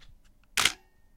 Nikon D800 Shutter 1 125 Sec with Lens
The Sound of the Nikon D800 Shutter.
With Lens.
Shutterspeed: 1 / 125
Camera, Nikon, Kamera, Mirror, Sound, D800, Speed, Lens, Shutter